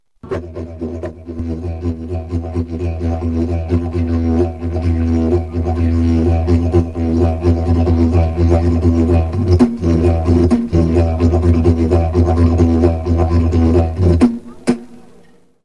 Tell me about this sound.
Digeridoo16sec
Mini disc recording Mossman Gorge Aboriginal guide.
didgeridoo, field-recording